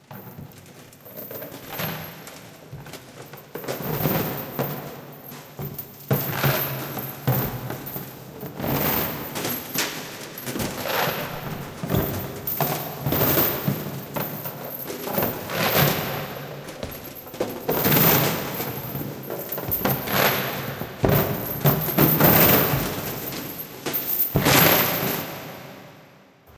Marleys Approach
Marley approaches dragging his chains and cashboxes.
Recorded for the Stormy Weather Players' production of Dickens' "A Christmas Carol".
rattle, shake, chains